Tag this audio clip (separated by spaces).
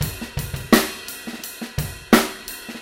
16 drum